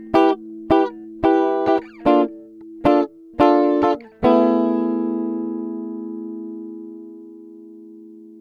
guitar chord rhytam 2
fusion, pattern, guitar, acid, groovie, funk, licks, jazz, jazzy